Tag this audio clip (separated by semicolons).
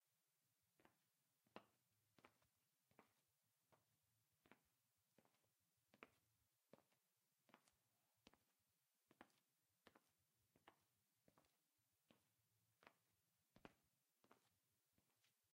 step; walk